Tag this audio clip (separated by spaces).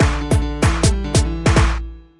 techno loop flstudio